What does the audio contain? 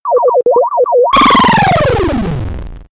Its everyone's favourite arcade game - Random Obnoxious Beeping.
You know it, don't lie.